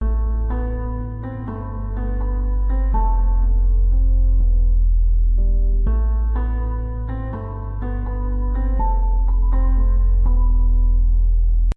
Loopy Sad 3
Needed a good sad track for my videos. I find sad a lot harder to compose than happy. Hopefully it fits, but if it doesn't work for me, maybe it will work for you!
sad, musical